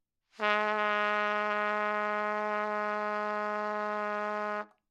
Part of the Good-sounds dataset of monophonic instrumental sounds.
instrument::trumpet
note::Gsharp
octave::3
midi note::44
tuning reference::440
good-sounds-id::1330